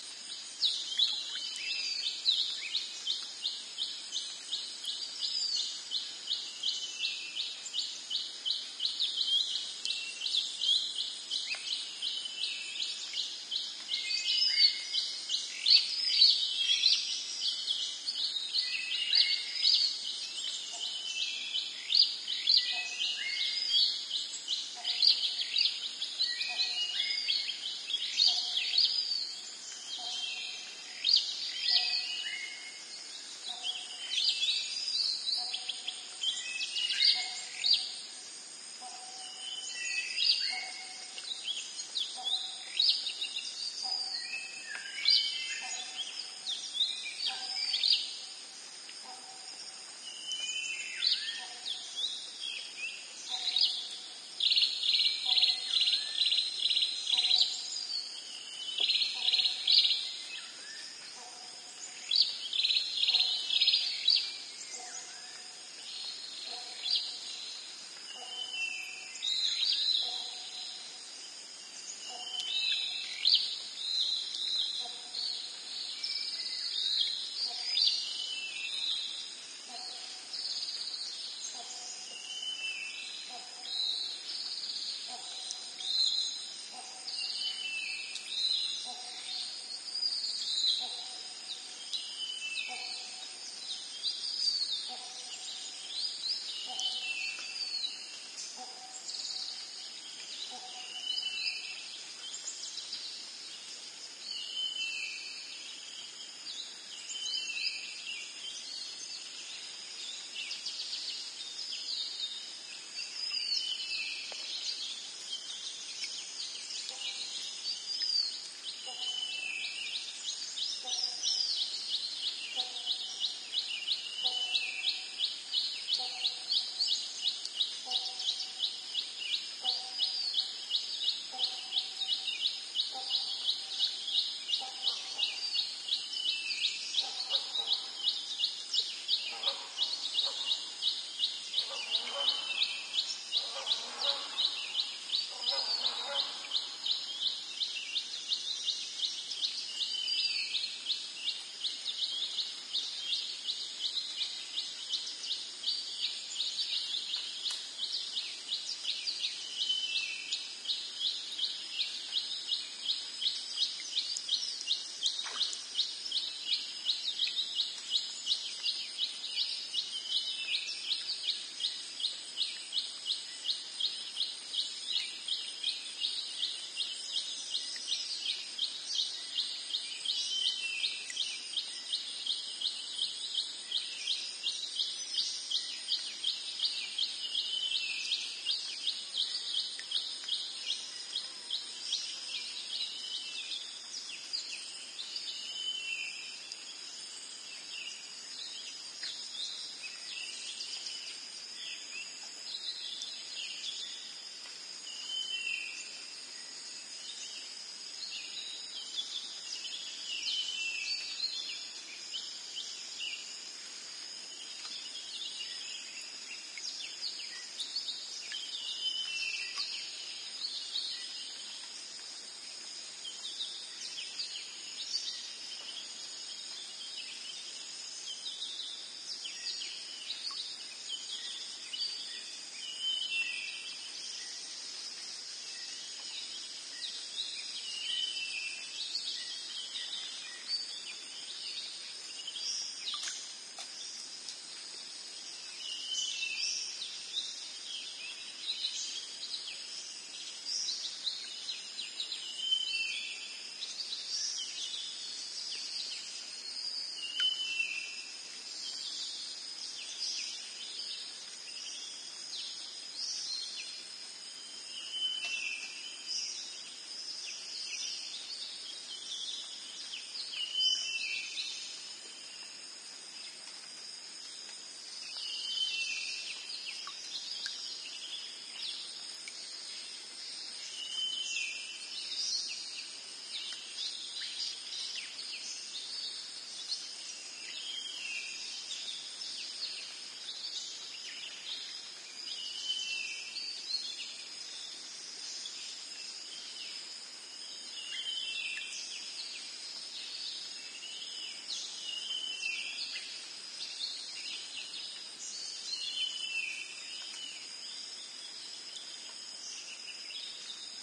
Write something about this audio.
Thailand jungle morning crickets, birds echo +water drops on plants duelling hornbills cleaned